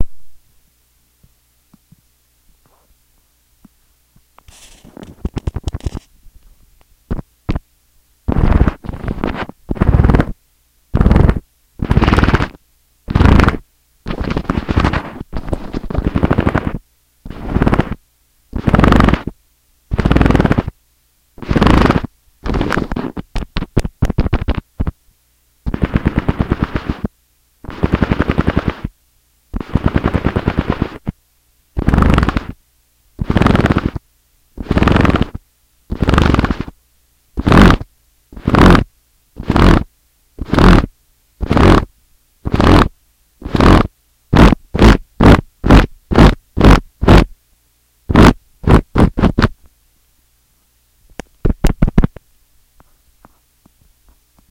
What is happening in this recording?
touching a pc keyboard
perception, ambient, contact-mic